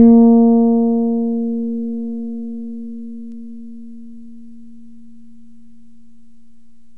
These are all sounds from an electric six string contrabass tuned in fourths from the low A on the piano up, with strings A D G C F Bb recorded using Cool Edit Pro. The lowest string plays the first eight notes, then there are five on each subsequent string until we get to the Bb string, which plays all the rest. I will probably do a set with vibrato and a growlier tone, and maybe a set using all notes on all strings. There is a picture of the bass used in the pack at